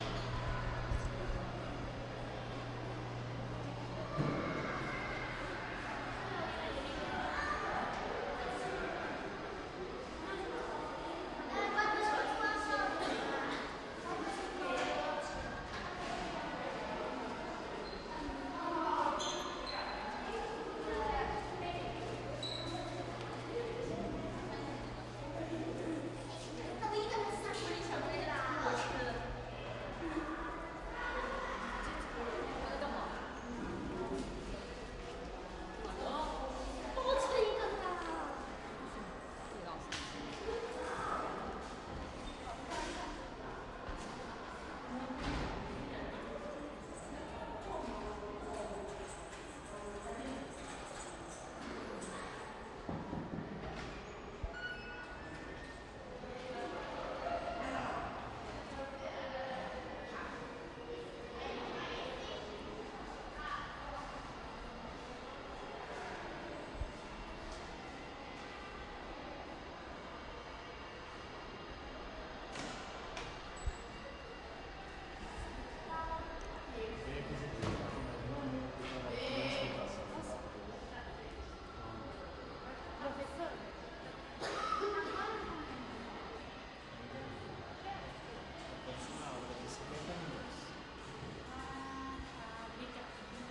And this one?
Ambiente de corredor no Colégio São Bento, São Paulo.